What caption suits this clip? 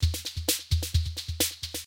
Rhythm king 1
Maestro Rhythm King analog drum machine loop 1 - 16-bit, Mono, 44.100 kHz
analog, drum, drums, king, machine, maestro, rhythm, vintage